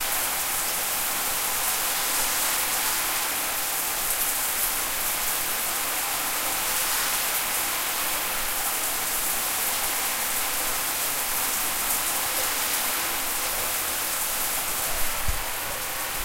Shower XY
Shower's running water